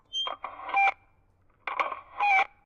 buzzer feeback
The feedback when hanging up the phone of a door buzzer. Recorded outdoor with a zoom H4 and a sennheizer long gun microphone.
buzzer, door